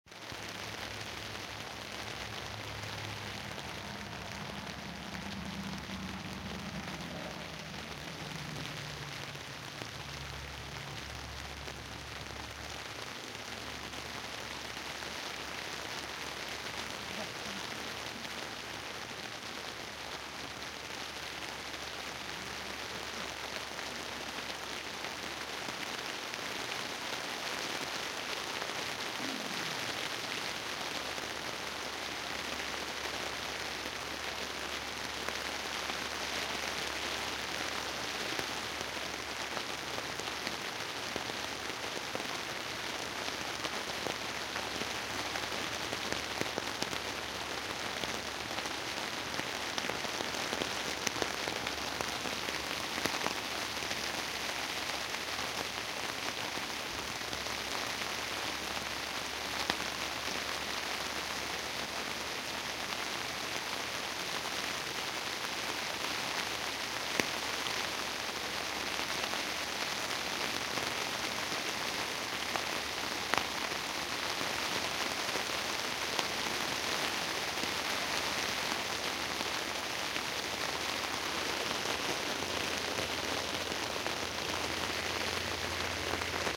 Rain on tent
Rain falls on a tent. Recorded with mobile phone.
rain, downpour, rainfall, relax, relaxing, storm, camping, tent